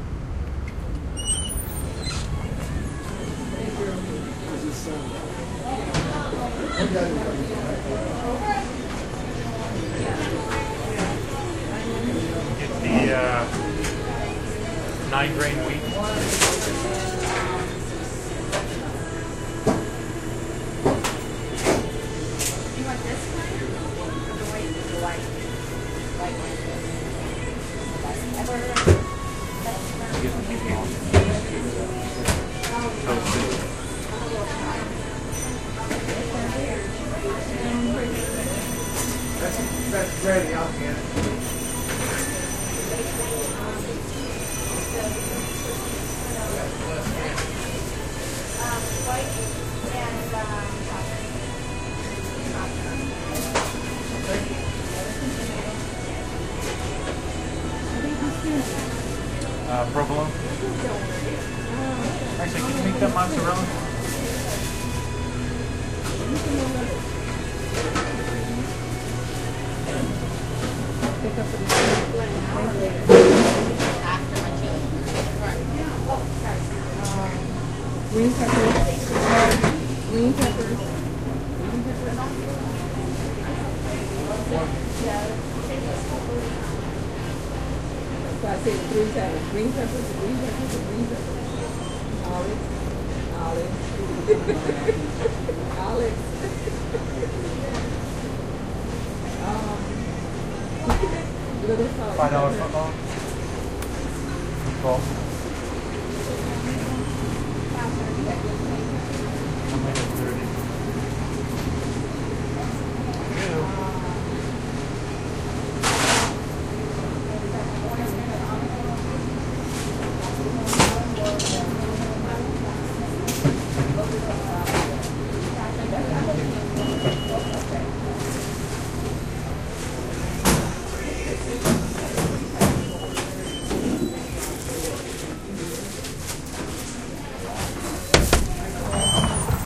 Recorded during a 12 hour work day. Walking over and buying a subway $5 foot long. Got the veggie... I mean the meatball...